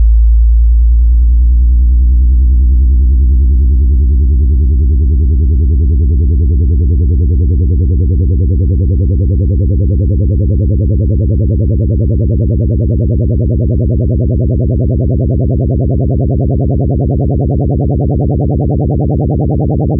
Sine wave "boing" bass rendered in Cooledit 96 and somehow made bassier.
bass, boing, digital, sine, synth, synthesis, synthesizer, synthetic, wave